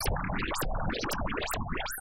Percussive rhythm elements created with image synth and graphic patterns.